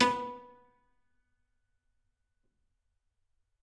Tiny little piano bits of piano recordings
sound, live, sounds, noise, horndt, marcus, piano